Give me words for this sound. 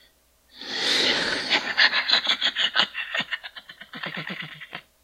New laughs for this years Halloween!